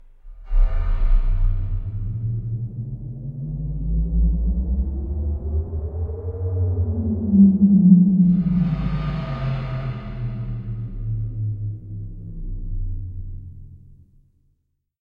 Strange space sweep with some out space ambiance. All done on my Virus TI. Sequencing done within Cubase 5, audio editing within Wavelab 6.